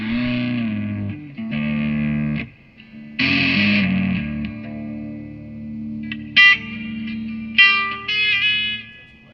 awkward-guitar-sounds1
Alex Eliot plays guitar through the amp into the microphone.